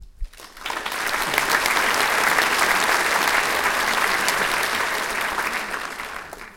Audiance applauding in concert hall.
Field recording using Zoom H1 recorder.
Location: De Doelen theatre Rotterdam Netherlands